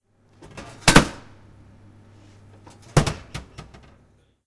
Taking Product
Taking a product from a vending machine.
campus-upf, product, take, UPF-CS12, vending-machine